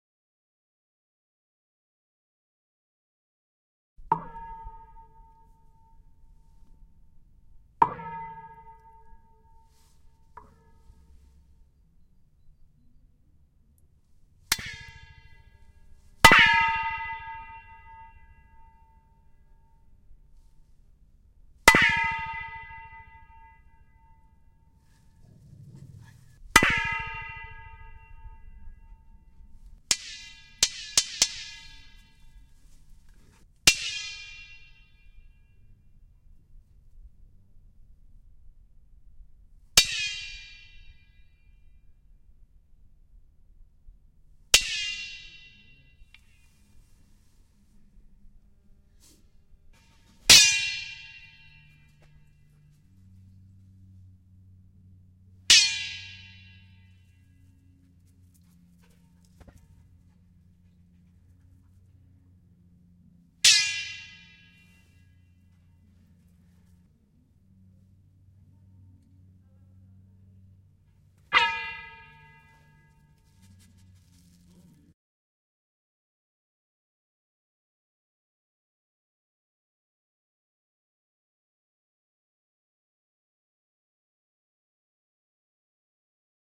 Pipe Reverb
Various claps, hits, sticks and stones recorded into the end of a short 10m length of heavy plastic pipe that was being laid down on my local beach. Recorded using a Zoom H2 - compiled an edited to remove additional noise. Could be useful for sound-effects or convolution reverb.
reverb, sound-effect, hit, pipe, clap, phase, plastic, flange, echo